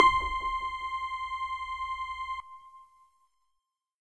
THE REAL VIRUS 09 - SUB BAZZ - C6
Big bass sound, with very short attack and big low end. High frequencies get very thin... All done on my Virus TI. Sequencing done within Cubase 5, audio editing within Wavelab 6.
bass, multisample